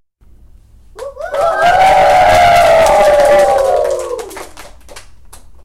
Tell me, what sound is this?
Aplauso com Gritos
Aplauso de pessoas/alunos/crianças
applause, cheer, audience, aplausos, crowd, palmas, people, gritos, cheering